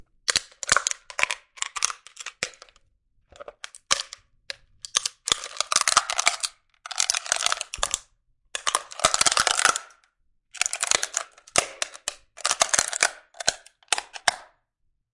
deforming can 2
Field-recording of can with natural catacomb reverb. If you use it - send me a link :)